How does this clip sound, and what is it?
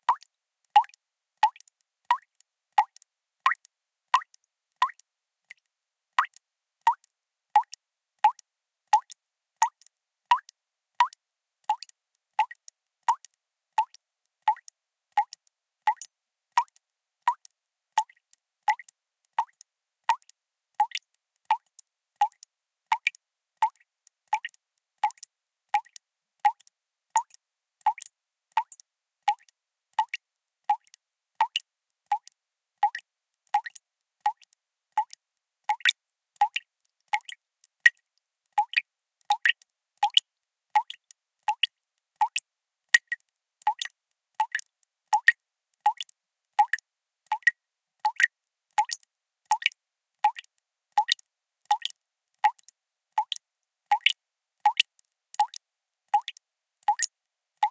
Drips rapid
Drops of water falling at a somewhat rapid rate into a 2-cup glass measuring container with some water accumulated in it. Some background noise remains but has been reduce to a low level -- just add your own filtering and ambience or reverb. Seamless loop.
drip, dripping, drop, faucet, kitchen, loop, plop, splash, water